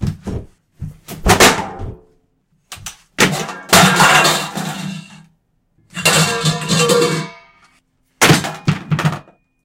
Assorted stuff being knocked off shelves and crashed into -- a metal pan, some tools, plastic bucket, etc.
Recorded with a Blue Yeti mic, using Audacity.